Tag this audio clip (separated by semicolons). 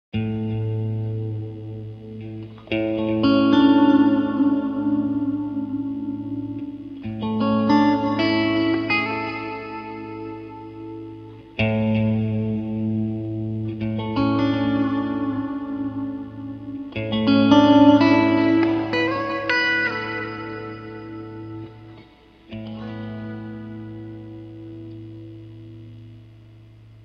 ambient; chords